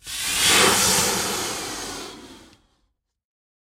Balloon-Inflate-24
Balloon inflating. Recorded with Zoom H4
balloon, inflate